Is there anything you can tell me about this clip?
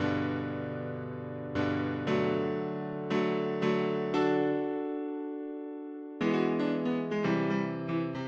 Was in a pile of older stuff about to be deleted! Can be applied to various styles.
NO MIXING APPLIED.